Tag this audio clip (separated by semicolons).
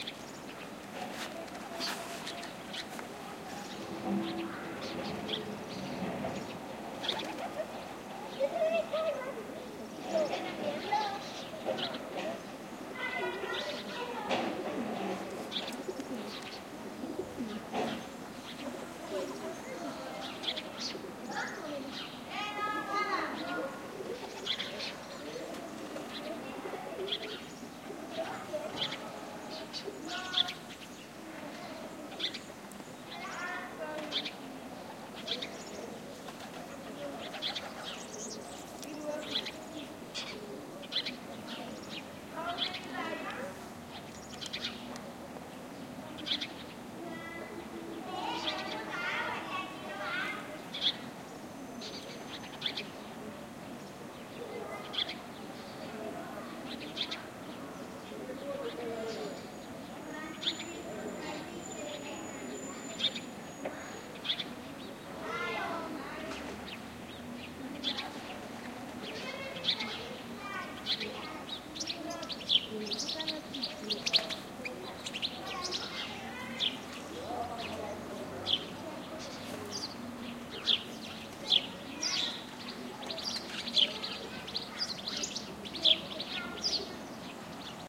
ambiance; voices; field-recording; chirps; spring